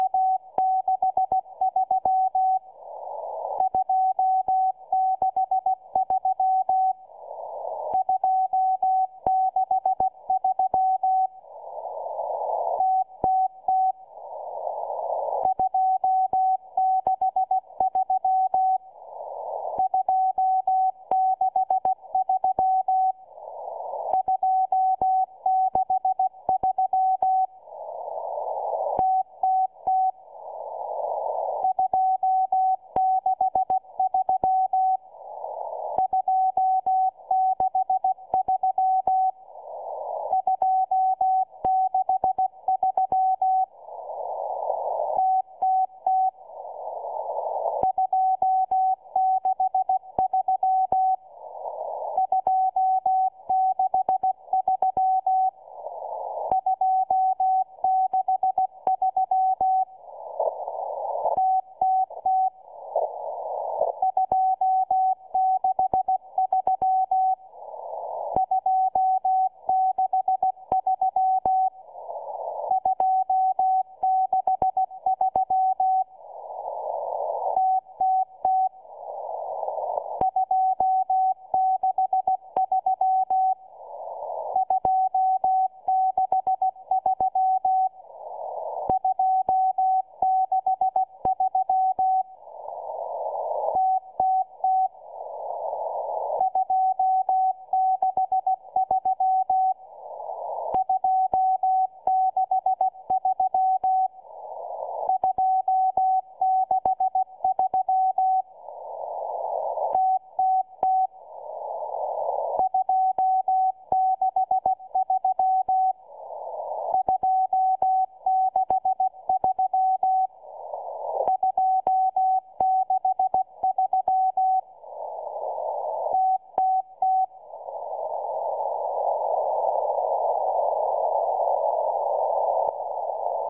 14669.00 kHz CW M12
Morse code number station recorded at 14669.00 CW.
number-station, code, radio, morse, shortwave, static, CW, short-wave, morse-code, communication, station, beep, number, M12, transmission